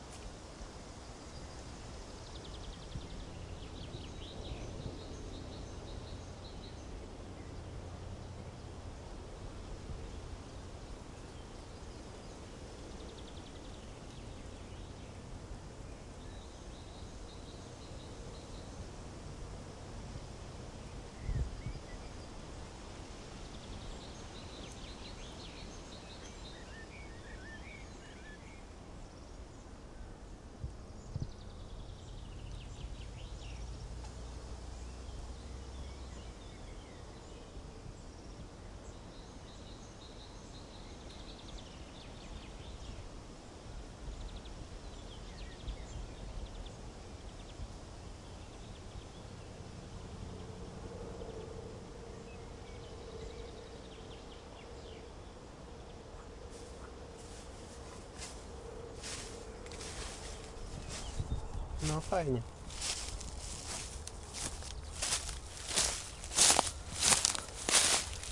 Birds, wind, leaf walking
wind, forest, leaf, walking